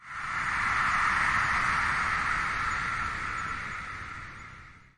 Gust of Wind 6
Processed wind noise.
I slowed it down in Audacity.
60009, air, ambience, ambient, blow, gust, nature, wind